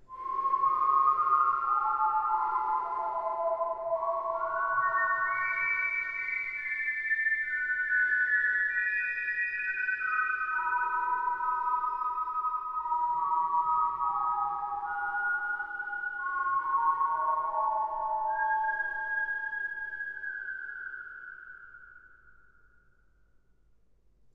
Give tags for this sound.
death; effect; fear; haunted; horror; murderer; Scary; sinister; sound; spooky; thrill; whistle

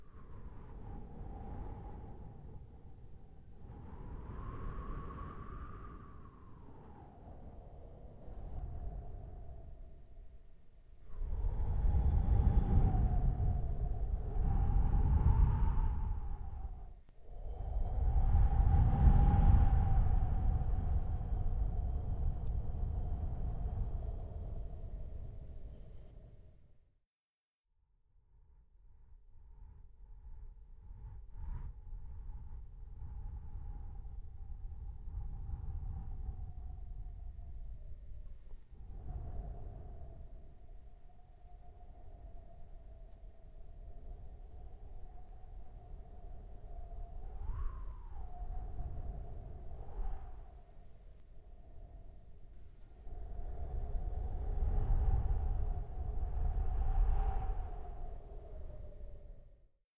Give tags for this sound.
apocalypse; breeze; fallout; gale; gust; gusts; nature; storm; wasteland; weather; wind; windy

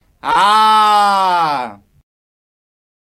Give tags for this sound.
dramatic; terror; scream; 666moviescreams; crazy

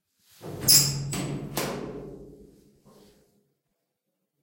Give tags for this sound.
door; metal-door; open-door; opening-door; opening-metal-door

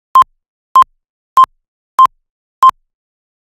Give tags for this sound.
2; 2-Pop; 2-Pop-Synch; Countdown; Film; Filmmaking; glitch; Movies; Omnisphere; pitch; Pop; Post-Production; Production; Sync; Television; Time; Timecode; Tone; TV

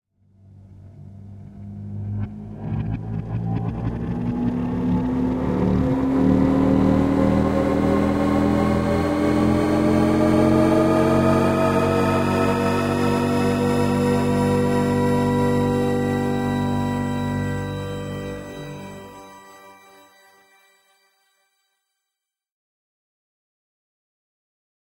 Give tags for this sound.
sfx; sound-design; design; exclusive; intro; Cinema; future; movie; dramatic; sound; opening; theme; effect; trailer

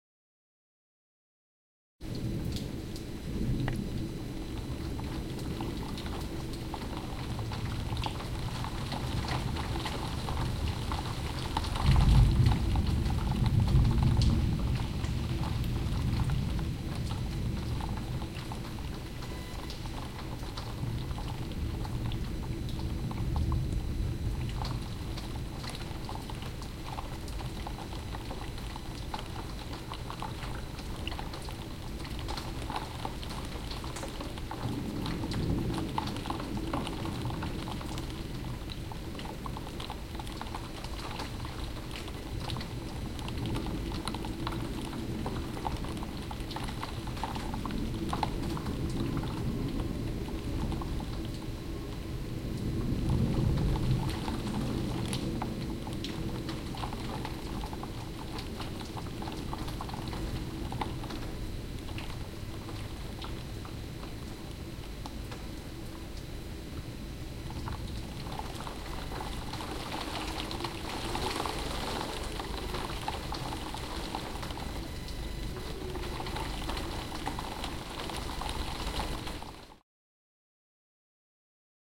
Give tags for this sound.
ambience; atmosphere; background; Rain; rumble; Storm; thunder; weather

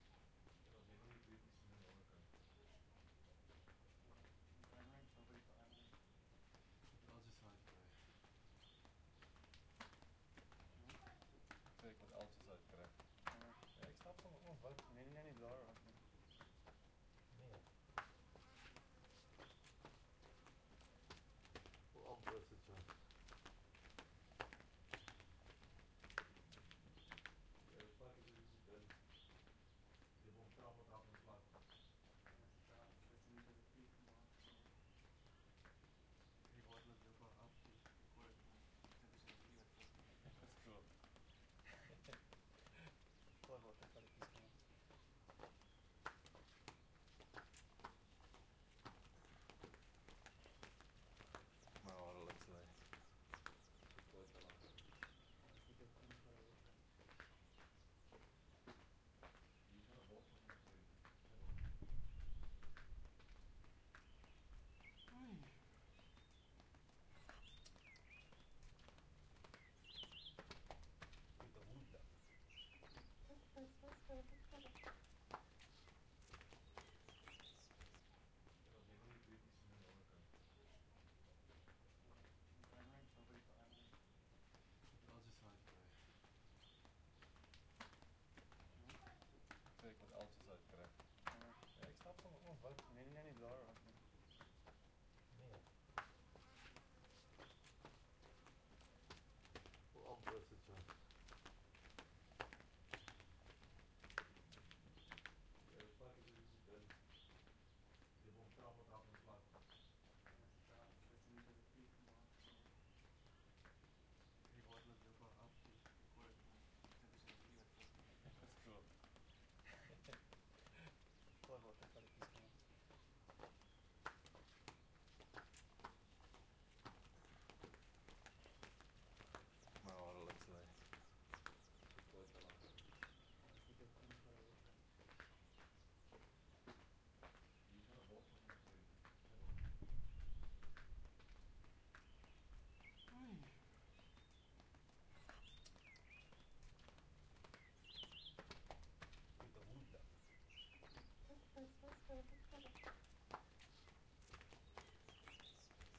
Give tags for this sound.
ambience; atmosphere; field-recording; outdoors; OWI; people; talking; walking

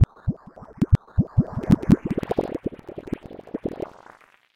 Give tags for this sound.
freaky; bubbles; sounddesign; lo-fi

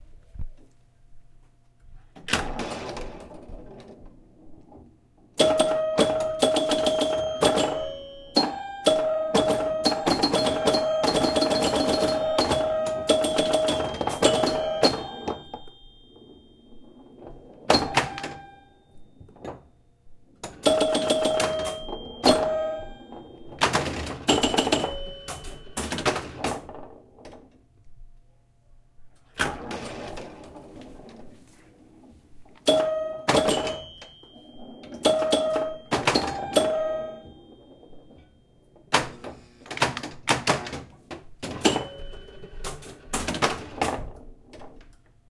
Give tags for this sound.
arcade; electric; environmental-sounds-research; game; mechanical; pinball

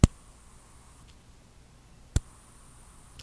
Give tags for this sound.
noises; foley

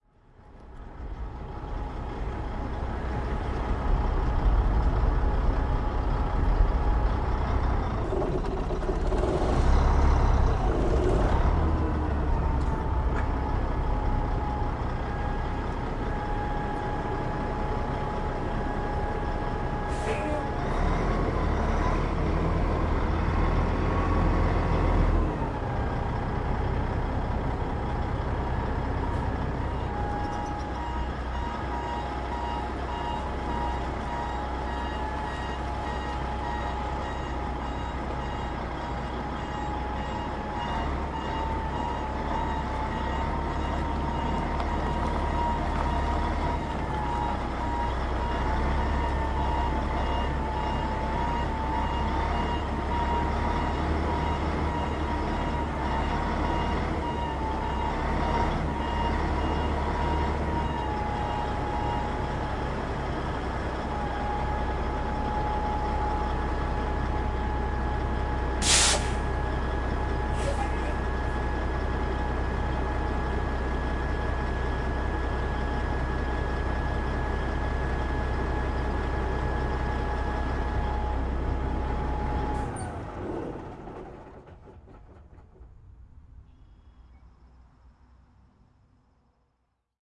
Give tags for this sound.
back-up; diesel; engine; field-recording; ford; idle; motor; semi; start; tractor; truck

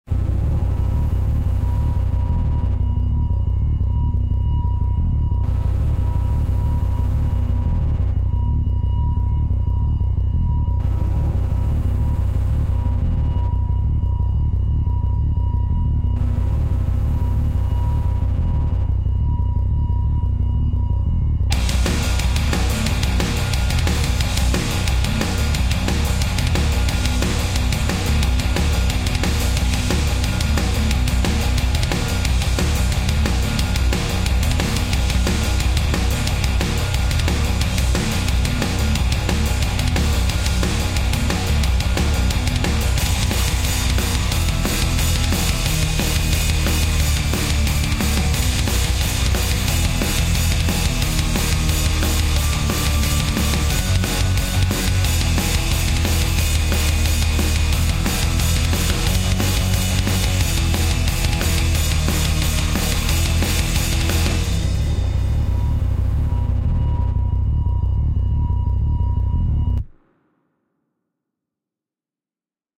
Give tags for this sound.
background; guitar; metal; music; rock

